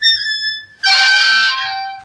An old seesaw squealing